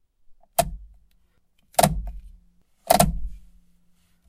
Wiper Switch 123
Mazda MX5 (Miata) Switching through Window Wiper Settings 1 through 3